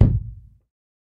Bass Drum Sample 1
Bass Drum recorded with a AKG C414